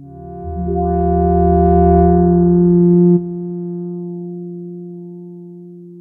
tubular system G#1
This sample is part of the "K5005 multisample 11 tubular system" sample
pack. It is a multisample to import into your favorite sampler. It is a
tubular bell sound with quite some varying pitches. In the sample pack
there are 16 samples evenly spread across 5 octaves (C1 till C6). The
note in the sample name (C, E or G#) does not indicate the pitch of the
sound. The sound was created with the K5005 ensemble from the user
library of Reaktor. After that normalizing and fades were applied within Cubase SX.
bell, experimental, multisample, reaktor, tubular